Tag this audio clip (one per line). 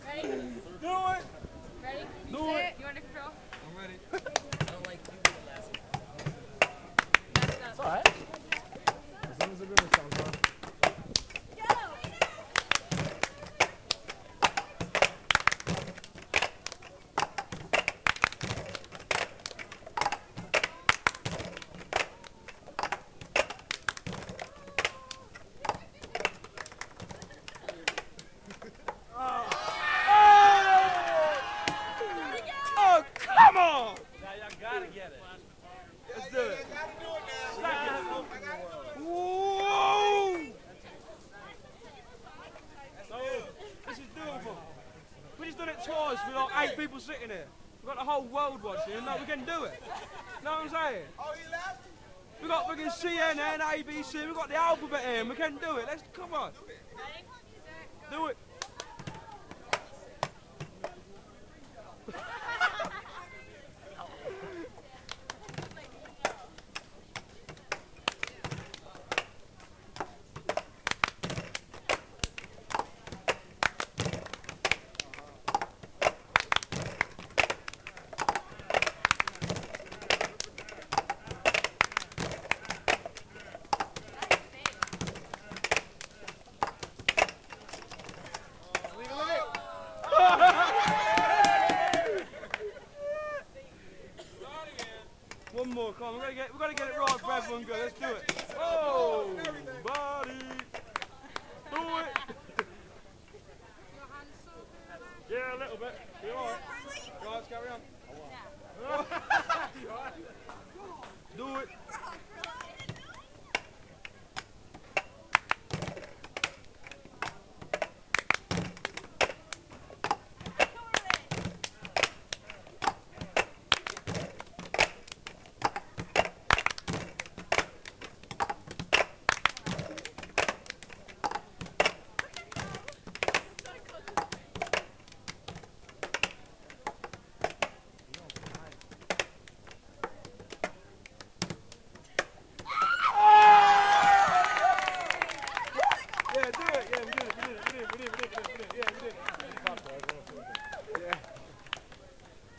handclap
table
beat
cups